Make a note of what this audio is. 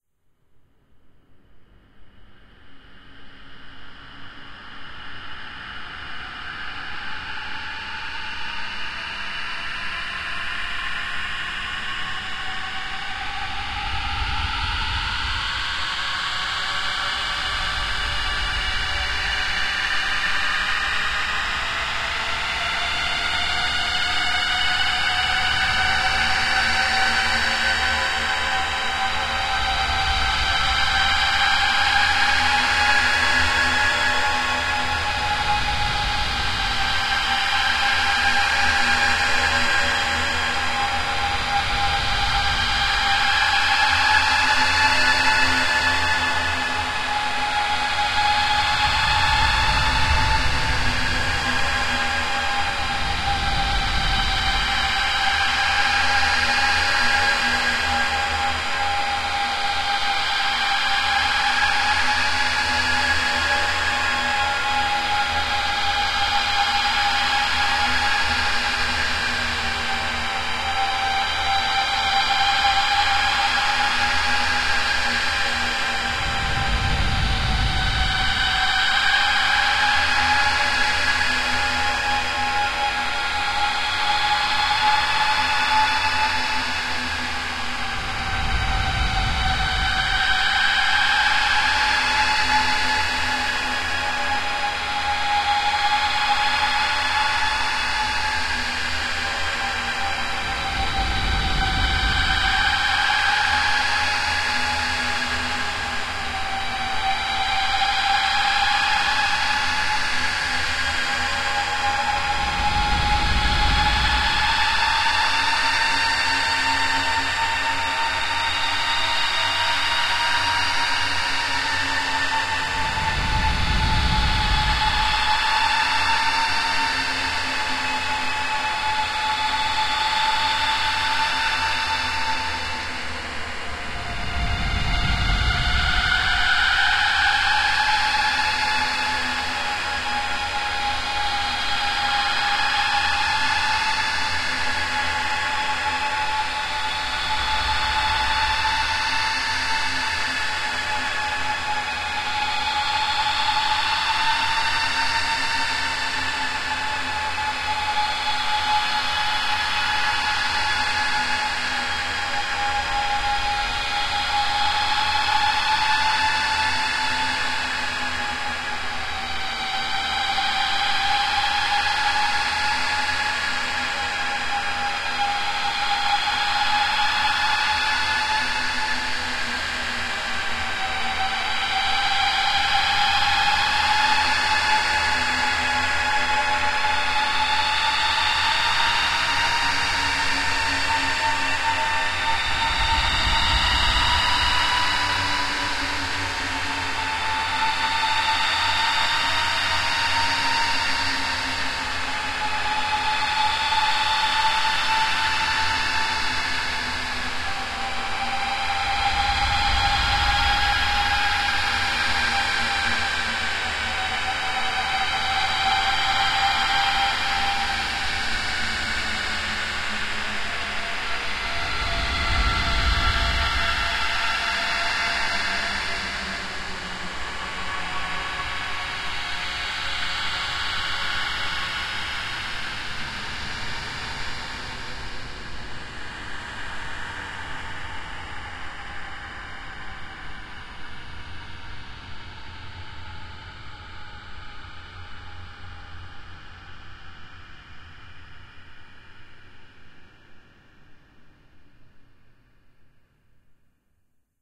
A very surreal and scary sounding siren with a lot of ambience. Also has a slight lazer beam effect in the background